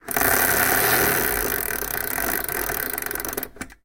Clock (Cuckoo) - Wind 3
Cuckoo clock's third chain being pulled to wind the cuckoo-chime mechanism.
clock, clockwork, cuckoo-clock, mechanism, wind-up, windup